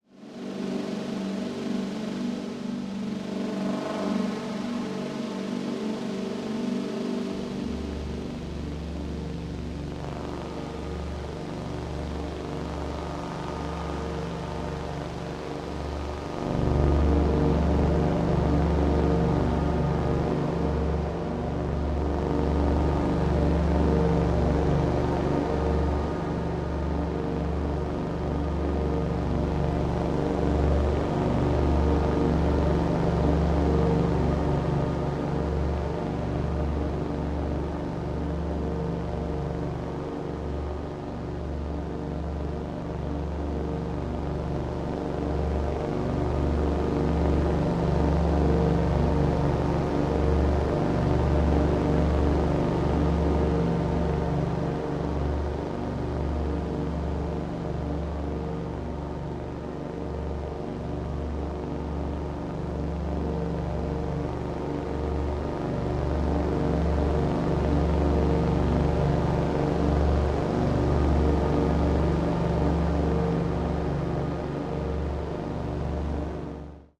NEIGHBORS LAWNMOWER
the neighbor at 7am on a Sunday morning.
Made with an Arp Odyssey (synthesizer)